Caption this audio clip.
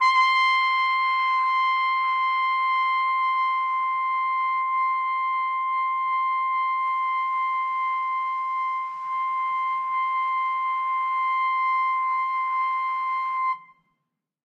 One-shot from Versilian Studios Chamber Orchestra 2: Community Edition sampling project.
Instrument family: Brass
Instrument: Trumpet
Articulation: sustain
Note: C6
Midi note: 84
Midi velocity (center): 31
Room type: Large Auditorium
Microphone: 2x Rode NT1-A spaced pair, mixed close mics
Performer: Sam Hebert
single-note, sustain, brass, vsco-2, c6, multisample, midi-velocity-31, trumpet, midi-note-84